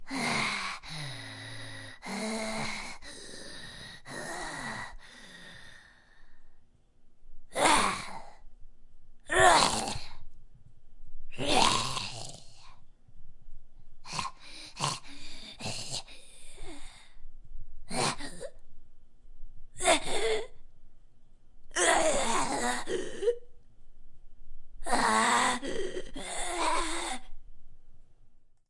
I was trying to mimic a zombie. The middle can sound a little gargled so listen to your own discretion if you happen to be eating. If you want, you can place a link into the comments of the work using the sound. Thank you.